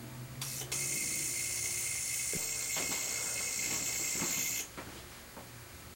An unstable, high-voltage laser running off AC.
Requested here:
circuit, electrical, flickr, overload, electricity, unprocessed, request, laser, light, electric